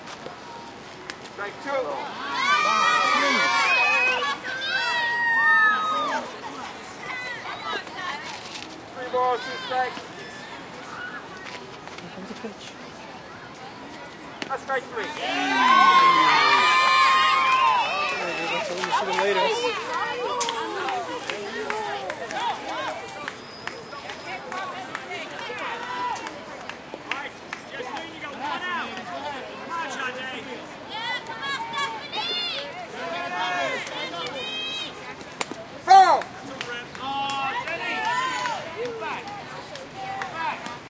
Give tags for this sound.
game girls baseball NYC USA

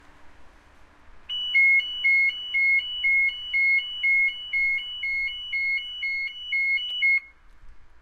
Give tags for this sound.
Volvo CarAlarm

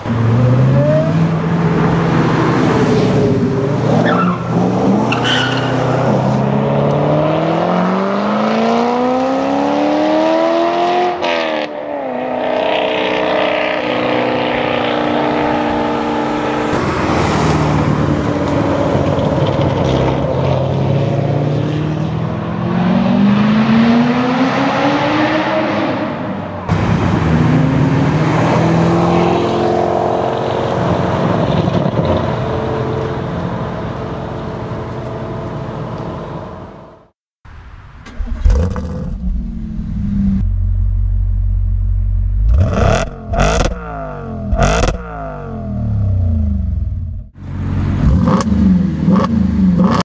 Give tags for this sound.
engine racing

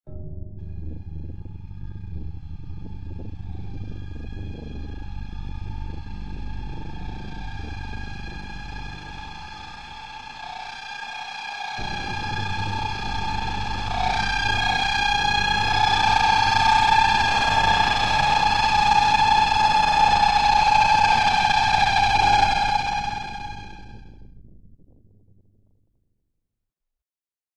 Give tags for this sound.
high pitch terror suspense phantom background-sound build terrifying anxious builders Gothic screatchy sinister thriller spooky creepy dramatic nightmare haunted scary sawing drama weird

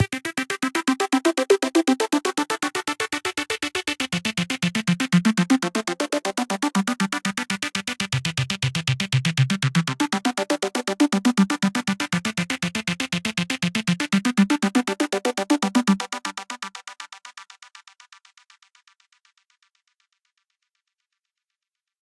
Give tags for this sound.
120 120bpm EDM arp arpeggiator arpeggio bass catchy creative dance earworm electronic fresh fun game happy melody modern music optimism original pack simulation synth synthesized synthwave trance upbeat videogame youthful

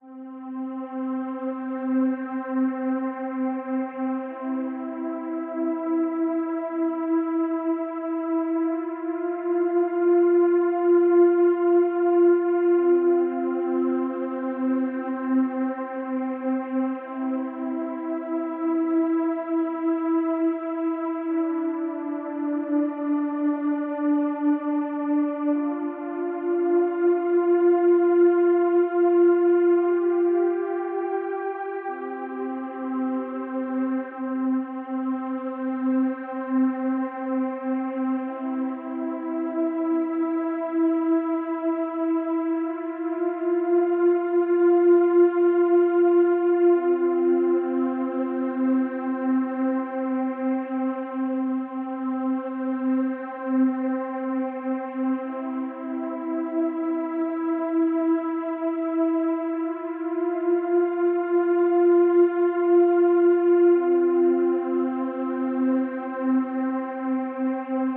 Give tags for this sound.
atmos background background-sound dramatic pad phantom

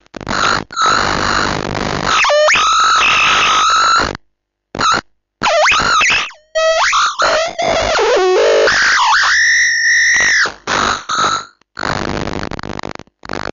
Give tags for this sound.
circuit bent sounds mix

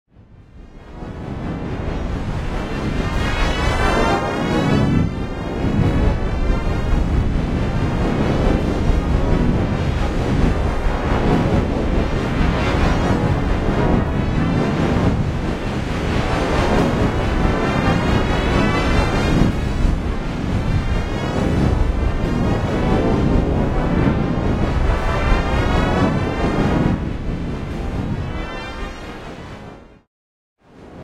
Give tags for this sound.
charging energy magic pulse

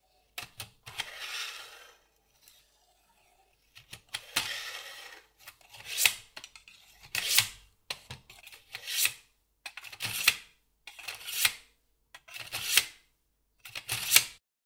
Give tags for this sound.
blade knife sharpen kitchen scrape OWI sharp

